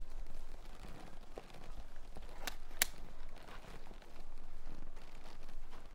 This is a recording of a piece of leather on the saddle tightening, and a buckle clicking.